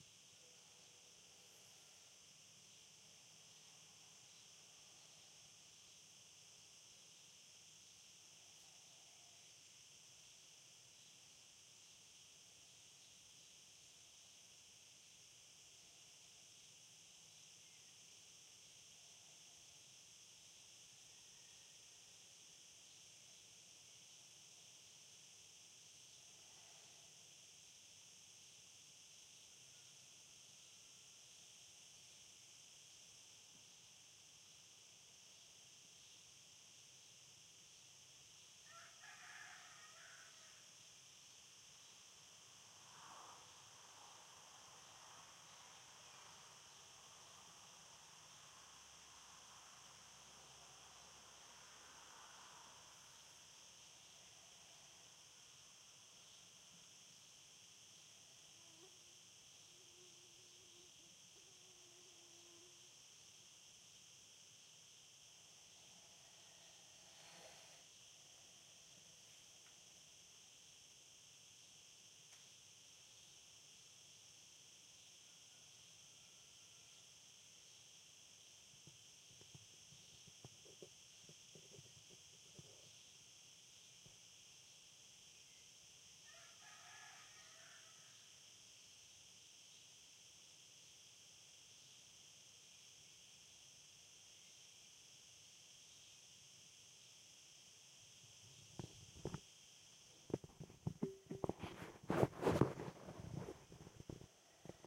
Field Recording in Denia. Crickets and windy day
Sound hunter from Valencia, Spain
nature, summer, pines, field-recording, crickets, ambient, birds, chicharras, fire-crackers